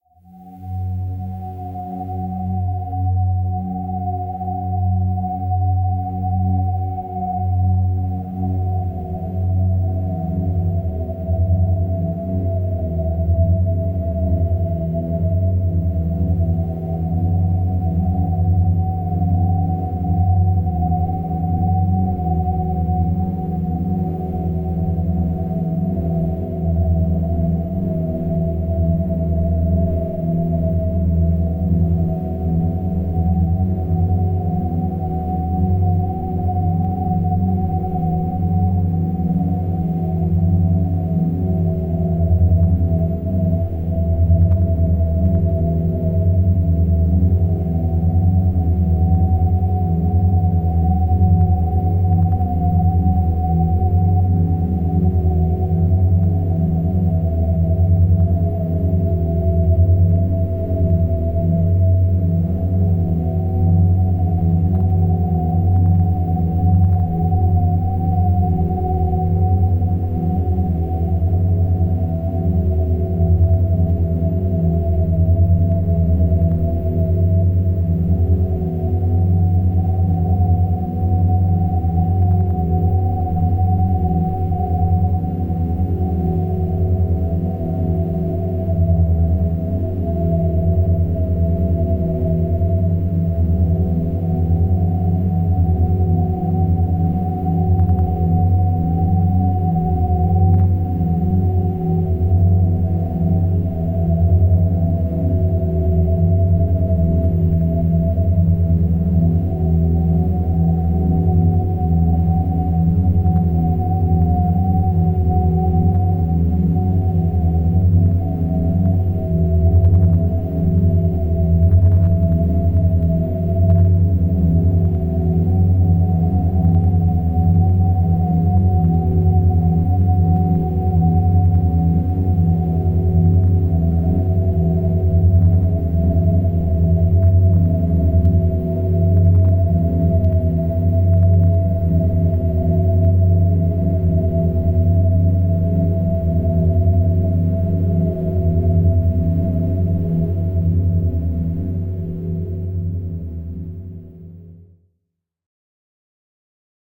Pad amb 2
Noisecore, new, Darkwave, Listening, NoiseBient, Psychedelic, Dark, Easy, Noise, Experimental, Ambient